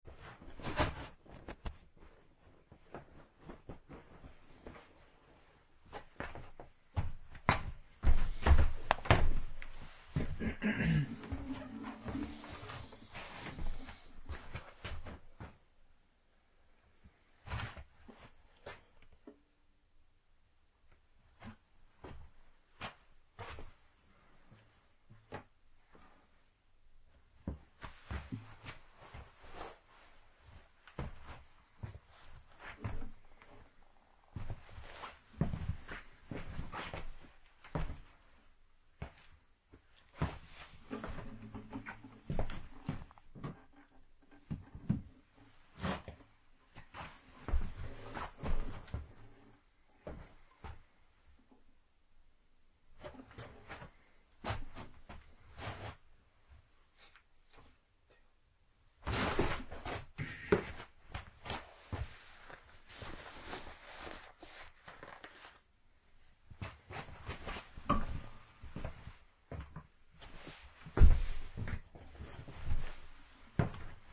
Me, searching for something, while moving around in the room. Lifting up my guitar, sighing and sitting down.
Recorded with a Sony HI-MD walkman MZ-NH1 minidisc recorder and two WM-61A Panasonic microphones
resonans, walking, searching, sigh, walk, guitar, bump